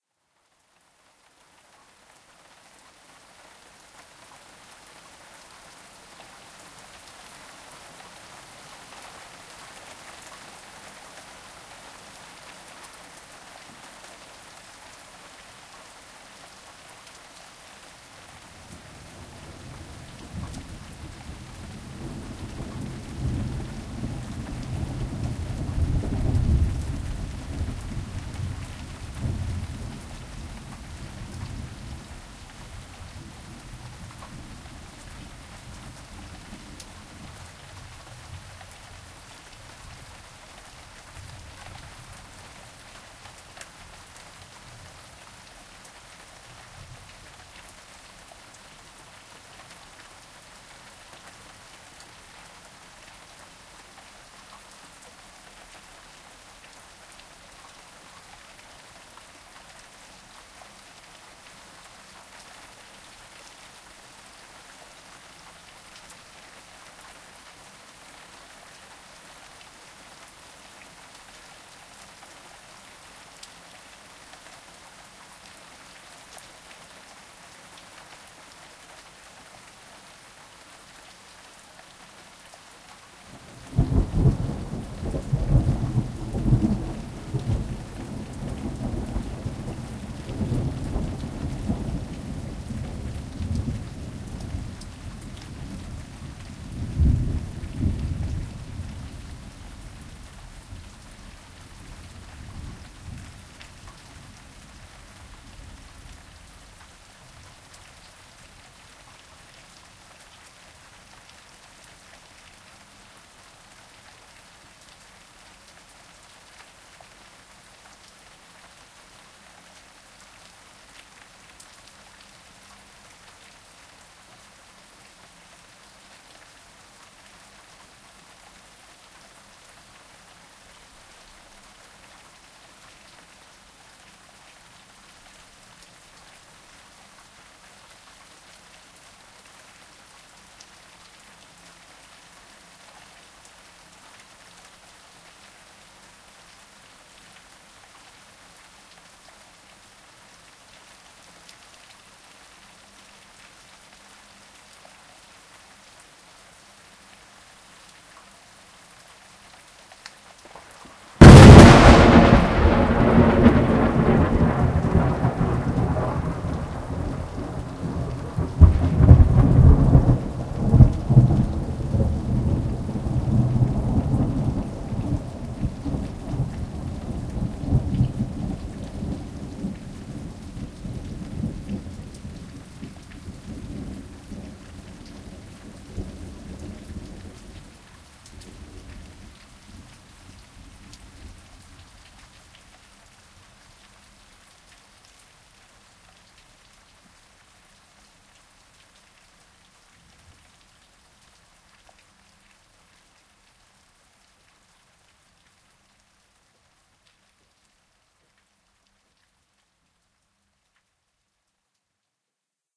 A heavy thunderstorm, mid-afternoon, after a long heatwave. The third and last lightening strike was about 100 meters from the microphone. You can just about hear the click (impulse response) of air being ionized just before the thunder.
wind, summer, thunderstorm, field-recording, rain, thunder, lightening